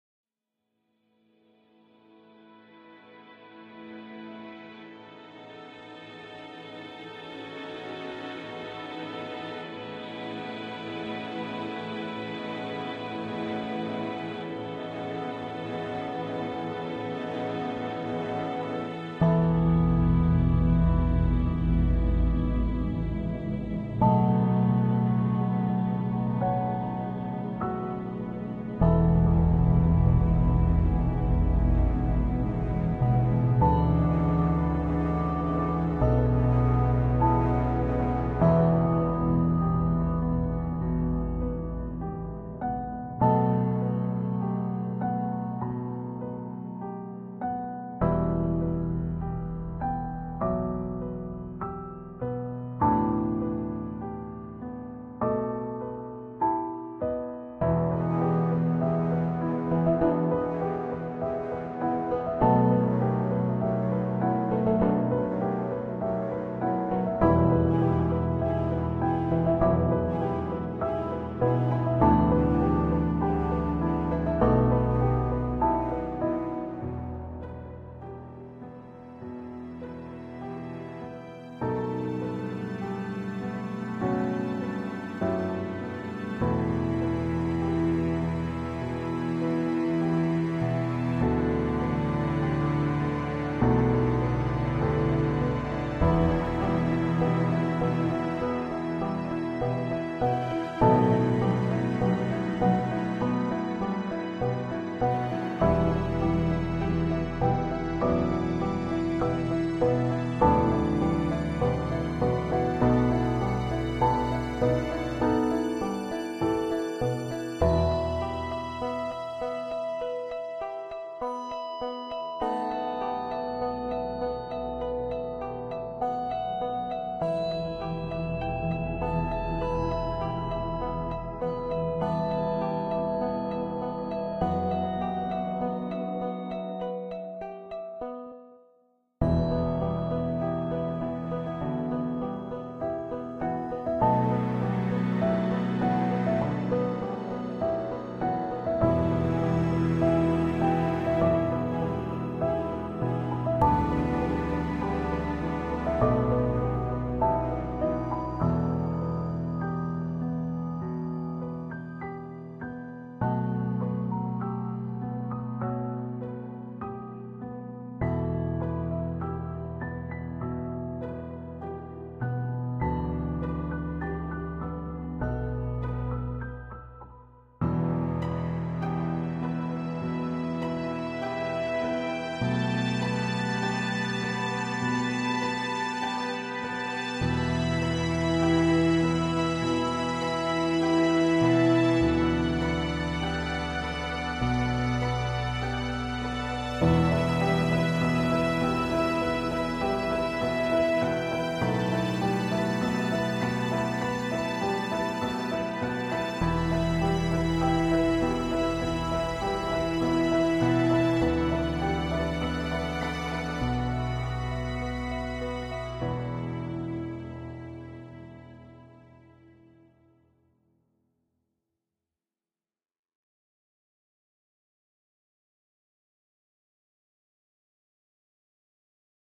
Old vinyl piano song, vintage. A very old piano track I composed. Recorded and mastered through audio software, no factory samples. Recorded in Ireland.